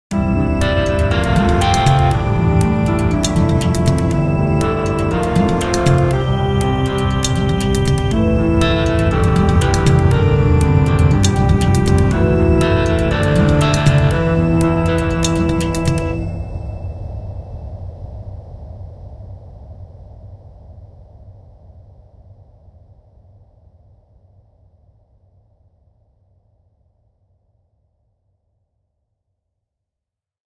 After Voyage 01
a short piece of music
electronic, soft, music, short, theme